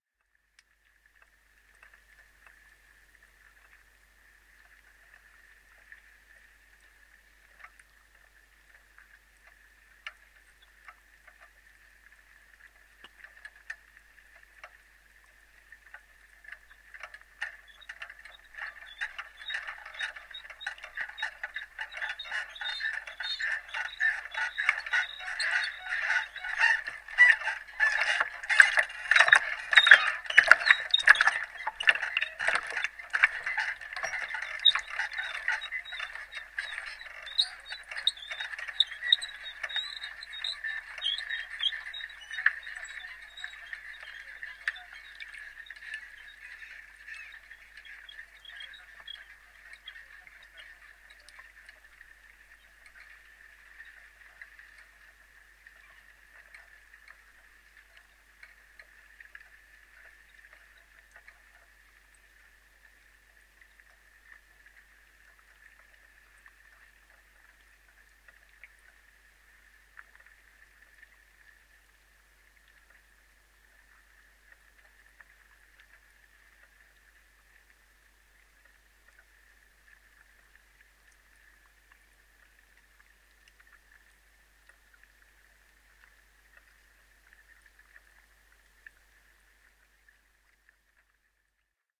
Hydrophone Newport Wetlands Pontoon Movement 02
This is a collection of sounds gathered from the Newport Wetlands Nature Reserve in Newport, UK.
I had the chance to borrow a hydrophone microphone from a very generous and helpful friend of mine.
There is quite a bit of high frequency hissing where I had to boost the gain to get a decent signal, but on a few (I thought I had broken the microphone) you can also hear the power line hum. It was a surprise to hear!
hydrophone, lake, squeaks, underwater